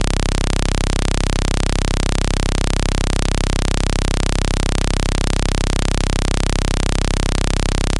Phat sawtooth wavetable
Sawtooth for sampling or custom wavetable.
phat, sample, Sawtooth, sound, wave